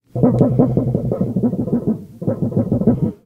PLASTIC SHAKING 002
The source was captured at very close range with the Josephson C720 microphone through NPNG preamplifier and into Pro Tools via Frontier Design Group converters. Final edits were performed in Cool Edit Pro.
shake, shaking, flip, flex, clear, cd, shaker, wobbling, vibrating, vibrate, wobbly, flexed, flipping, thin, flexing, wobble, vibration, disk, disc, polycarbonate, plastic, vinyl, wobbler